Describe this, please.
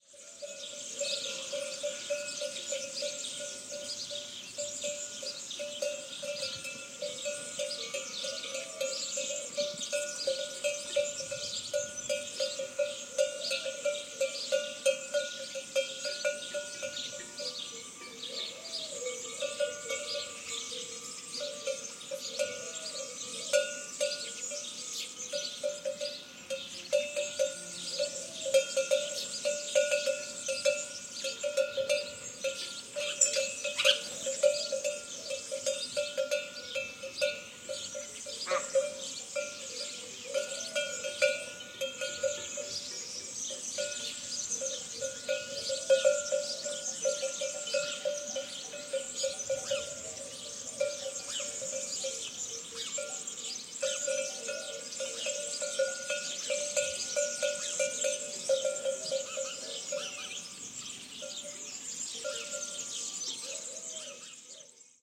20190625.hamlet.day.087
Rural ambiance with birds and cowbells noise (close). EM172 Matched Stereo Pair (Clippy XLR, by FEL Communications Ltd) into Sound Devices Mixpre-3. Recorded near Muda, a tiny village in N Spain (Palencia province). EM172 Matched Stereo Pair (Clippy XLR, by FEL Communications Ltd) into Sound Devices Mixpre-3.
cowbell, farm, birds, village, ambiance, cow, field-recording, countryside, moo, bovine, cattle